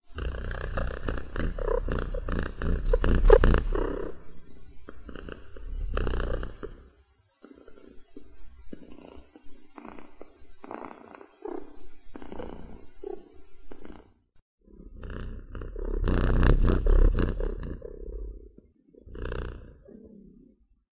My cat purrrring into cellphone mic. :) Normalized & got rid of ambient noise.